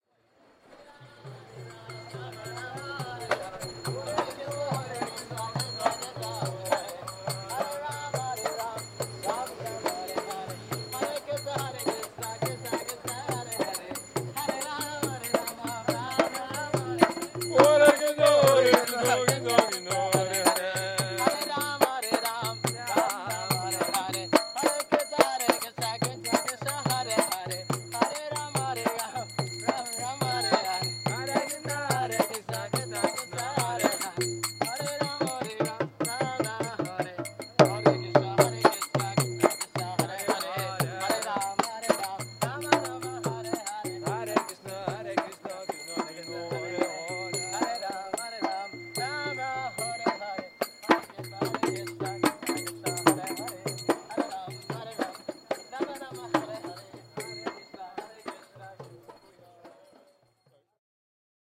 Hare Krishnas up close
Recorded on Marantz PMD661 with Rode NTG-2.
Two Hare Krishnas get up close while chanting in London on a busy afternoon.